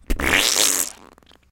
Everybody has to try their hand at making fart noises. Recorded using a Blue Yeti Microphone through Audacity. No-post processing. As can probably be guessed, I made it using my mouth. Sounds like one is squeezing out a long quantity of toothpaste.